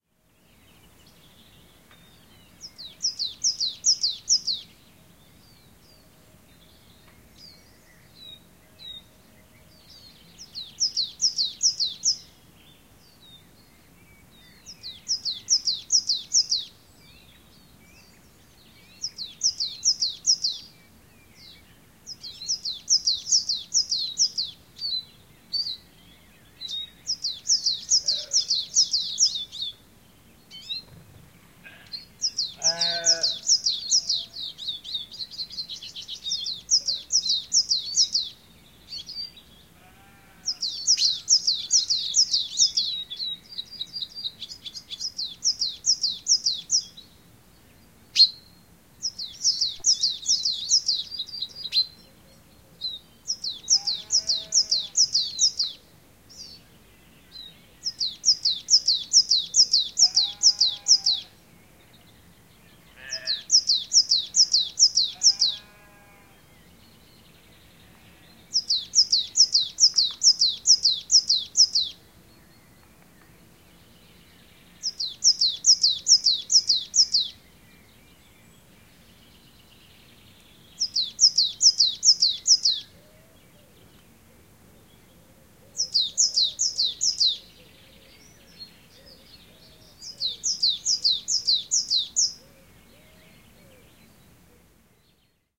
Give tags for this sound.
xy; field-recording; stereo; sheep; bird; birds

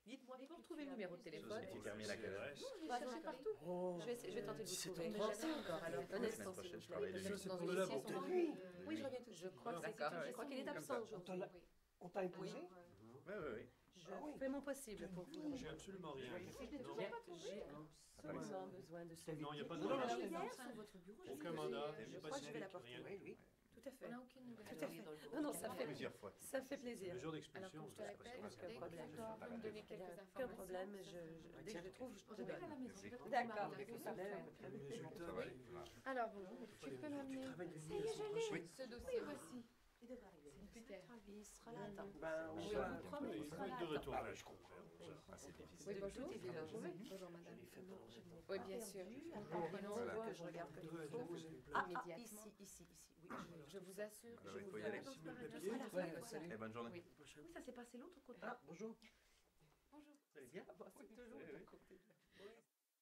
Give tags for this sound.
walla; vocal-ambiences; localization-assets; interior; police-station

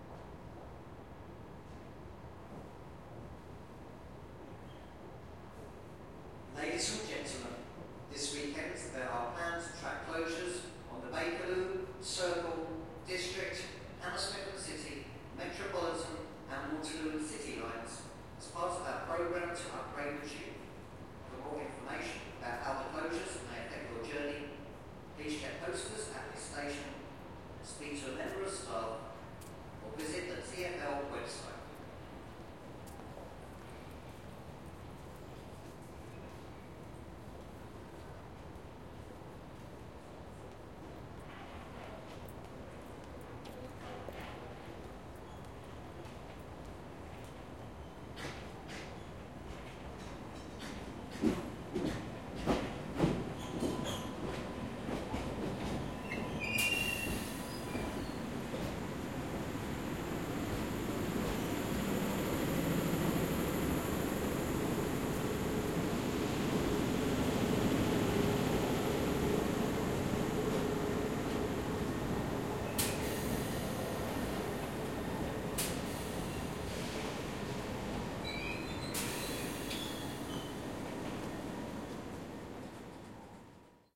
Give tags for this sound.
Platform; Underground; Arrive; Announcement; Tube; Train

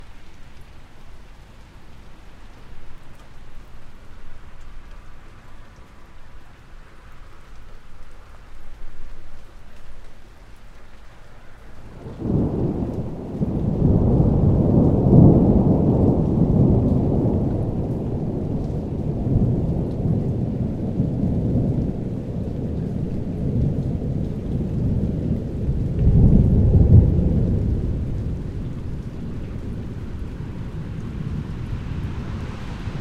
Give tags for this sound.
Lightening; Rain; Storm; Thunder

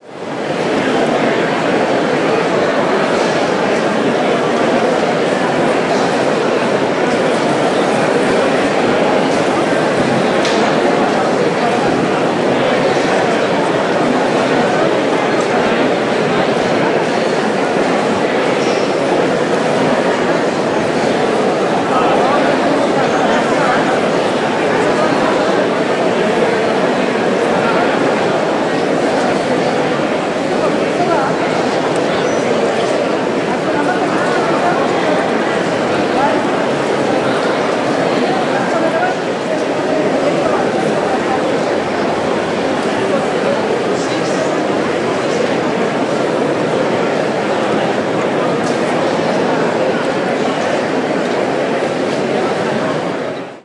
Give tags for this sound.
market; outdoor; walla

